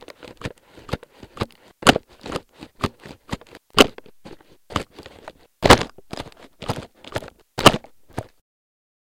Shaking Microphone
I put my awful dynamic microphone in a crisp packet and started shaking the microphone to a beat.
microphone, shaking, awful, rattling, shake, bag, dynamic, motion, shaked, mic